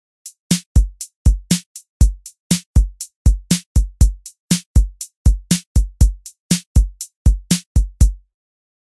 Rock 808 beat
808, beat, drum, drums, percussion, rhythm, rock